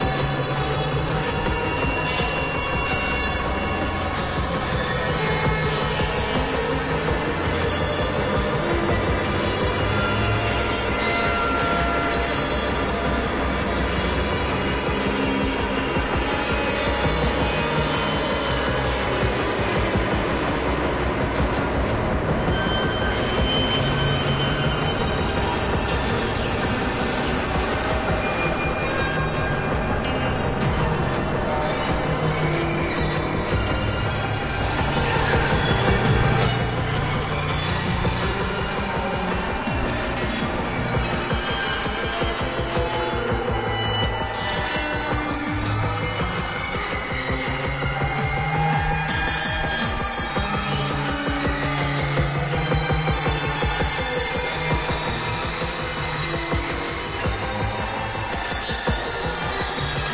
A little tension to chill your spine... Based on a Krell patch and with a few extras. Morphagene oven ready